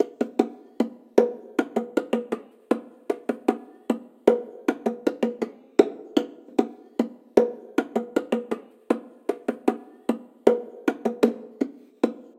bongo-loop 155bpm
percussion/bongo-loop in the tempo of 155bpm-------------------------------------------------------------------------------------------------------------------------------------------------------------------------------------------------------------------------------------------------------------------------------------------------------------------------------------------------------------------------------------------
bongo, perc, percs, percussion, percussion-loop, percussive, rhythm